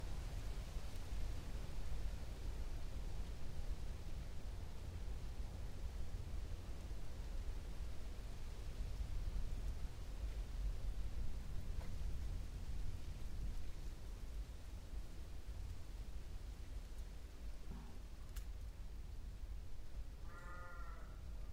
Ranch Ambience Sound 06
This is a recording of a field on a ranch.
Ambiance, Ranch, field